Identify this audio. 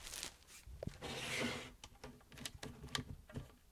Crumple and slide
cloth, fabric, hiss, metal, object, slide, swish